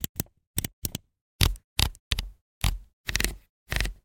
Dials on a Sony A7III.